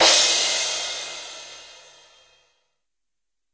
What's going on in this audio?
Rick DRUM SPLASH hard
Splash R hard
acoustic, stereo, rick, drum